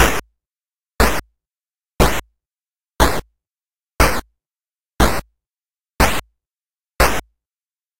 buncha crunchy snares for chopping